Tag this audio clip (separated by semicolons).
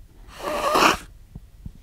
cocaine; coke; drug; drugs; sniff; snort